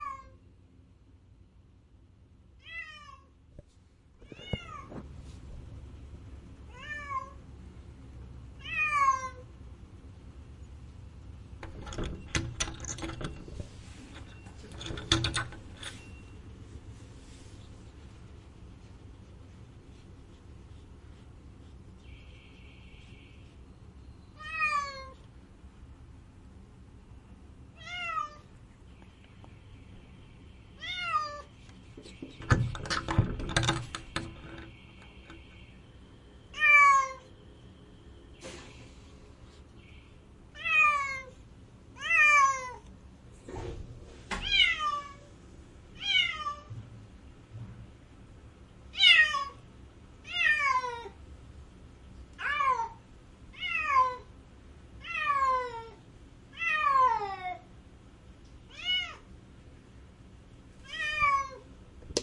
Cat Crying
Device: Zoom H5 (X-Y Stereo Input)
I locked my cat outside and he's begging me to open the door. Rarely can I manage to record the sound from a cat in such strong emotion, so I rolled the recorder for 1 minute with guilt.
I gave my cat a fish can afterward, so don't feel sorry when you use the sound.
16-bit, Animal, Cat, Crying, Stereo